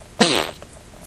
fart poot gas flatulence flatulation explosion noise weird
explosion, fart, flatulation, flatulence, gas, noise, poot, weird